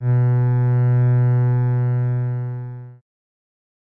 A synthesized cello sound created through AudioSauna. I'm not sure I'll ever find a use for it, so maybe you will. No claims on realism; that is in the eye of the beholder. This is the note G in octave 2.